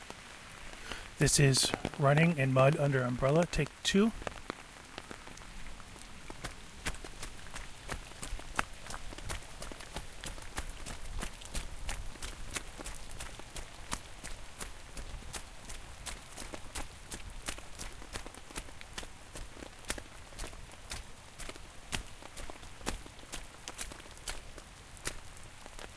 What It Is:
Me running in Griffith Park while it's raining.
Various vikings running on the battlefield.